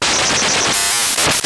Freya a speak and math. Some hardware processing.
speak-and-math, circuit-bent, glitch